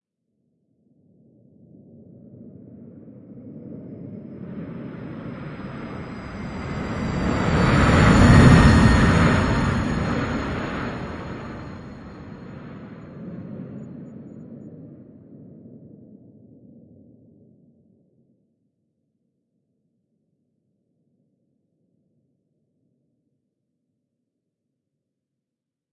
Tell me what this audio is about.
Air liner.25 seconds fly by 1-1.Louder(9lrs,mltprcssng)
The sound of an airliner flying over. Created artificially. It is based on the sound of the wind recorded in the mountains. The rest of the sounds used in the creation: the sound of a hiss of a gas burner, a whistling sound obtained by synthesis, the sound of a home vacuum cleaner (two versions of this sound with different pitch), low-frequency noise obtained by synthesis. The sound of the wind is processed differently for each of the three main layers. There is a distant layer with a tail, a near layer, an upper layer and a near layer with a low rumble (there is wind and low synth noise in it). Each of these layers goes through a flanger. And the last, tonal-noise layer consists of the sounds of a gas stove burner, a vacuum cleaner and a synthesizer whistle). All filtering, changes in pitch in the tonal-noise layer, level control by layers, are linked to one XY macro controller and their changes are programmed with different curves of rise, time of arrival and decay.
aircraft, plane, jet, aeroplane, flight, noise, sound, artificial, game, sounddesign